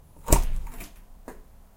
bath door O
close, bath, closing, door, open, opening